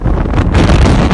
wind windy storm